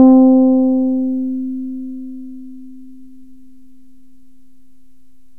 These are all sounds from an electric six string contrabass tuned in fourths from the low A on the piano up, with strings A D G C F Bb recorded using Cool Edit Pro. The lowest string plays the first eight notes, then there are five on each subsequent string until we get to the Bb string, which plays all the rest. I will probably do a set with vibrato and a growlier tone, and maybe a set using all notes on all strings. There is a picture of the bass used in the pack at